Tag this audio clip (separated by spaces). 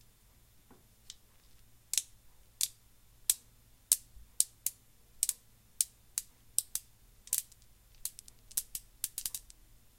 hit rosary beads